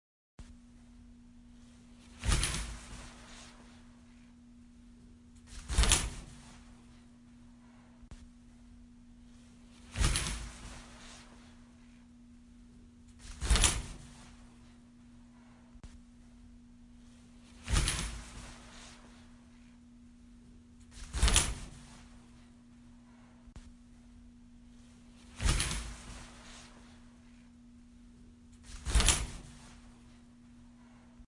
cortina de baño abriendo y cerrando
Cortina de baño vieja.